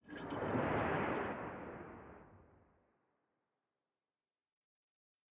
Fulfilling a user request for "underwater swishes and swooshes".
I initially planed to use some of my own recordings and even recorded some sounds for this purpose. The mixing was done in Ableton Live 8, using smoe of the built in effects (like EQ and reverb).
The sounds used are listed below.
Thanks to the original creators/recorders of the sounds I have used.
underwater, submarine, bubbles, movement, swoosh, swish